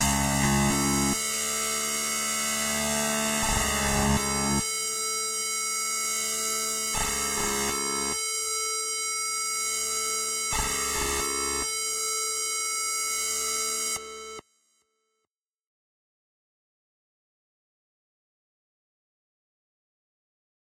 guitar screech
another feedback sound that i made on purpose
screech, palm, guitar, 80s, school, old, rhodes